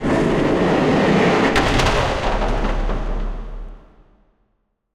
Gates of Hell (Gate Slam 1)
Made from taking two large ATX (the form factor, the chassis) computer panels (that I havent used in quite some time), rubbing the entirety of them together quite brashly (one is on the ground), keeping the recorder on XY setup in my other hand in unison then dropping them onto each other. Inside of REAPER, I begin changing the rate and pitching them down and applied saturation, distortion and reverberation gave them a very clean effect of a decrepit gate shutting down.
Good for trapping your enemies in a battle to the death for which the title is inspired from; one of those tension moments a person in a film/game finds themselves in.
industrial, close, shuttting, down, gate, trap, metal, shut, slam